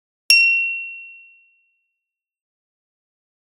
Crystal Glass Clink
Crystal glass struck with spoon. Clear, bell like sound.
bell, clink, crystal, glass, ring, ting